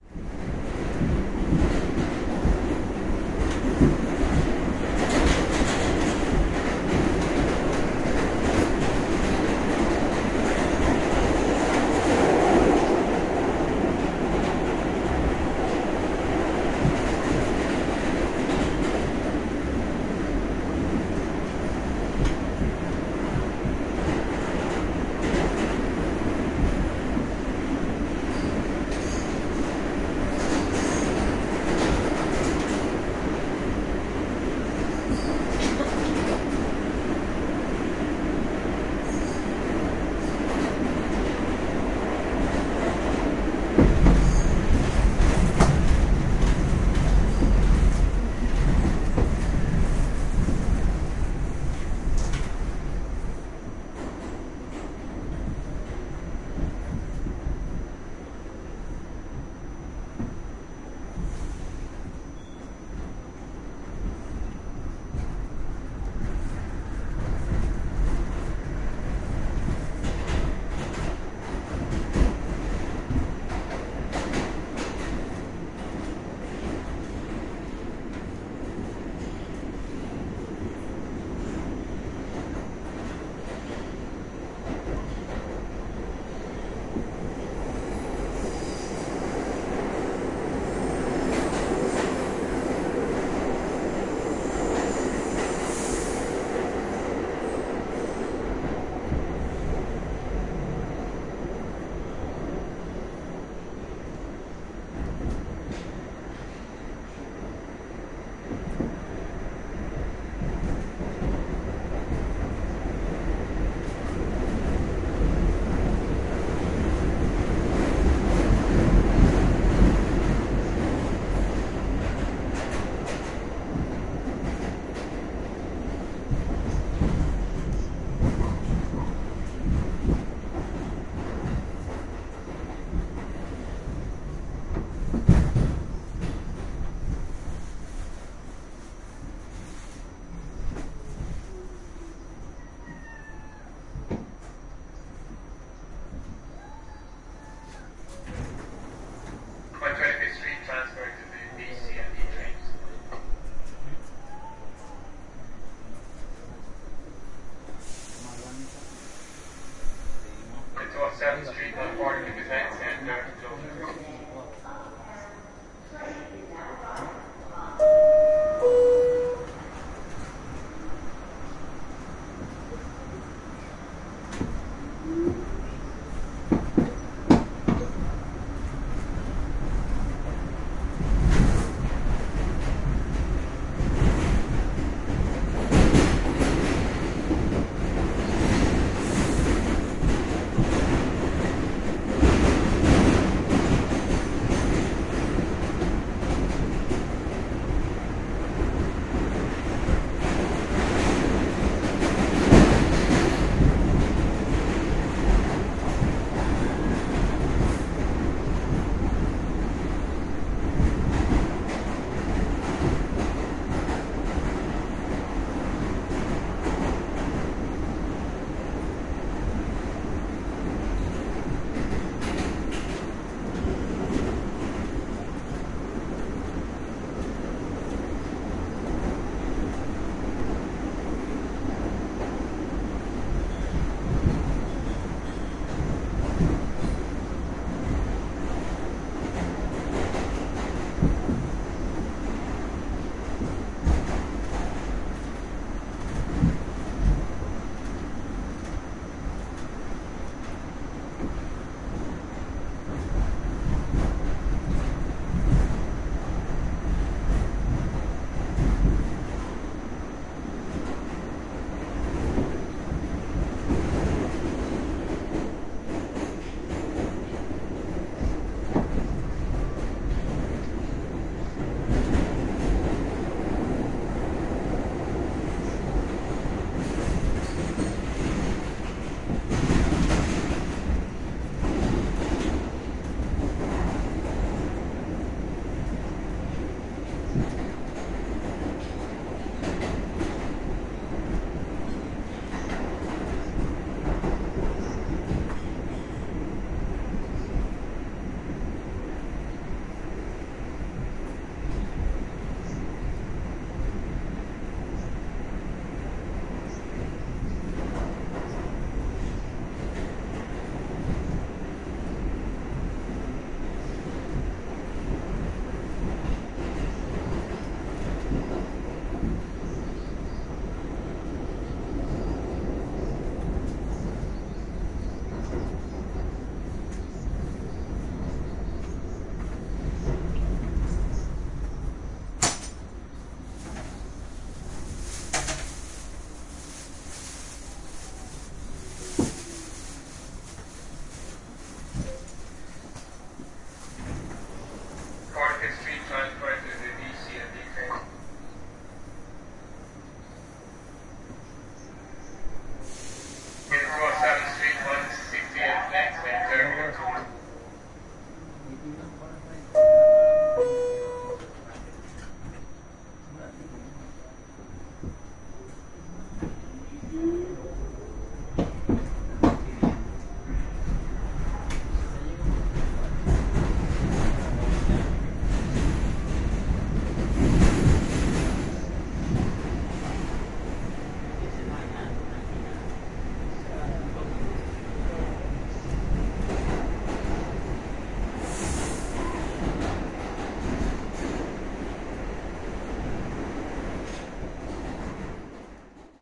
A Train Uptown Bound Afternoon 125 to 168 Sts
NYC MTA Subway, specifically an uptown-bound A train, weekday afternoon, approaching 125th St., through departing 145th St.
Perspective from interior, seated near door.
About 20 passengers in this car, contains some random light unintelligible conversation and shuffling.
Lots of good track sound, air brakes, stops and starts; conductor announcements and "ding-dong" of closing doors, as well as announcement and sounds on platform when in stations.
Stereo recording. Unprocessed.
A-train, interior, mass-transit, MTA, New-York, NYC, SubwayMTA, subway-platform, train, underground